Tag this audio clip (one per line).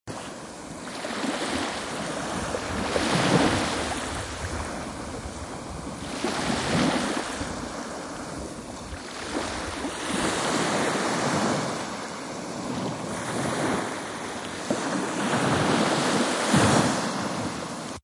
baltic
beach
coast
field-recordng
meer
nordsee
ocean
oceanside
ostsee
ozean
sea
seaside
shore
splash
water
waves
wellen
wind